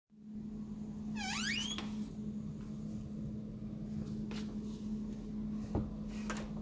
door squeak
Squeaky door sound.
creak, door, door-squeak, open, squeak, wood